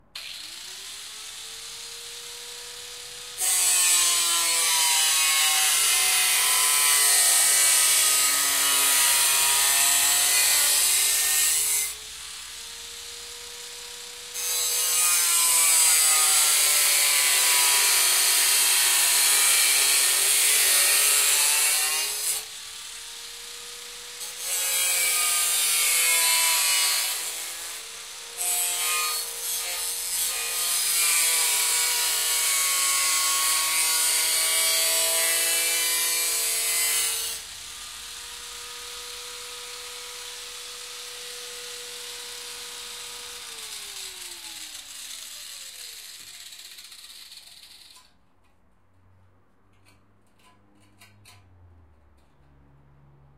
metalworking.hand circular saw 3
Builder sawing metal with hand circular saw.
Recorded 2012-09-30.